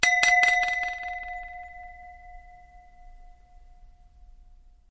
Strange bell
animation, bell, bells, cartoon, fantasy, film, game, movie, sleep, sleeping, video